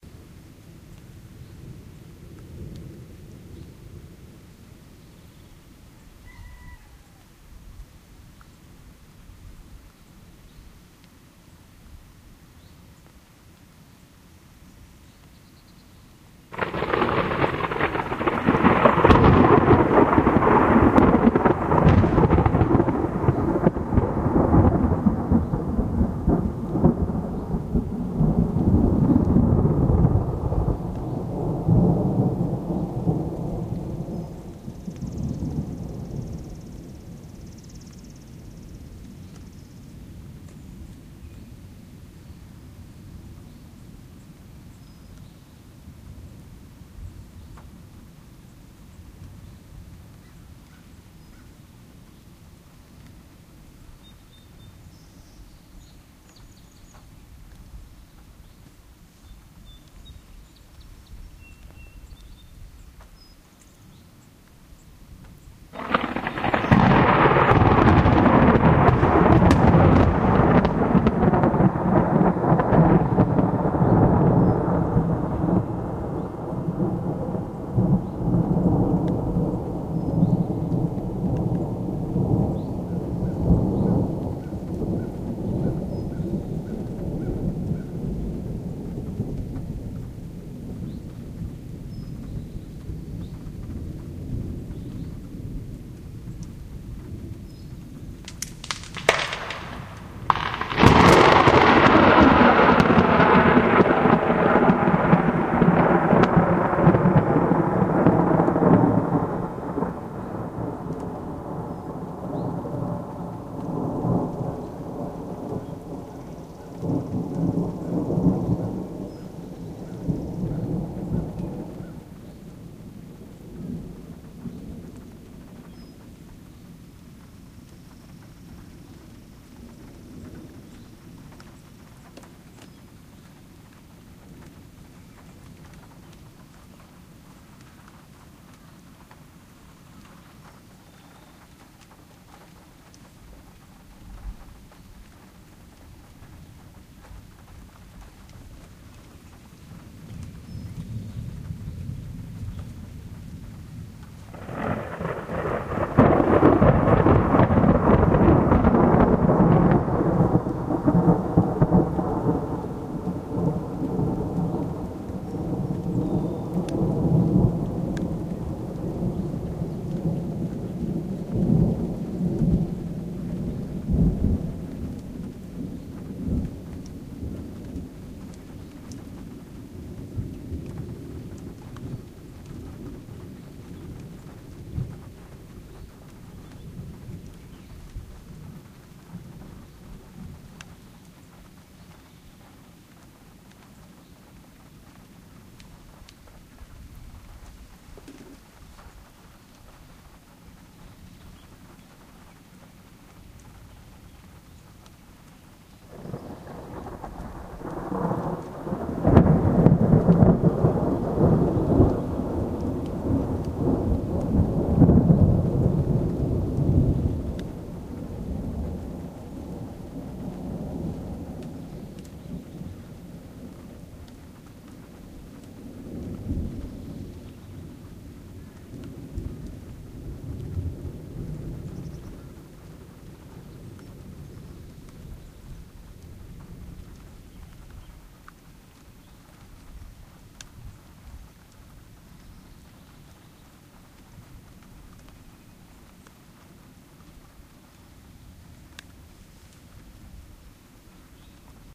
thunder ontop Pinnacle Mt
Two distinct claps of thunder. The THIRD clap was a once in a lifetime capture as the lightning can be distinctly heard as it splits the nearby air. I recorded this in Flat Rock North Carolina atop Pinnacle Mountain. I've geotagged the location.